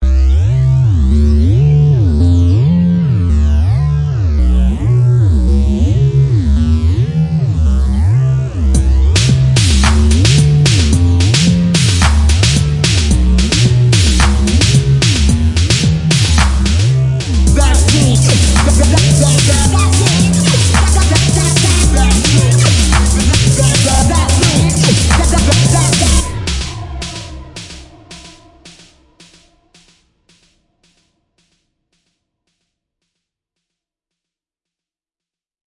6. part of the 2013 rave sample. Rave techno like instrumental loop.
This one includes deep space and space bass influence and hip-hop.